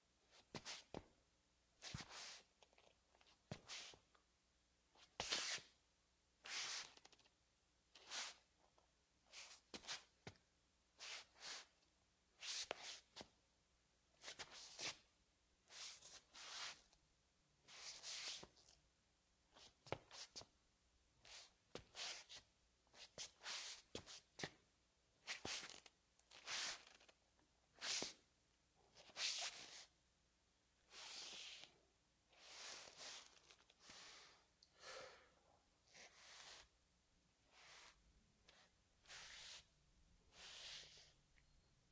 Footsteps, Tile, Male Sneakers, Scuffs
Sneakers on tile, scuffs
sneakers, tile, sneaker, shoes, scuff, scuffs, footsteps, footstep, linoleum, male